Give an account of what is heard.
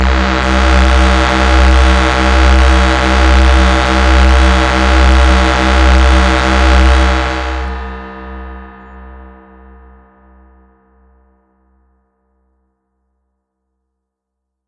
dirty square
Basic two square waves with a bit of distortion detuned, made in milkytracker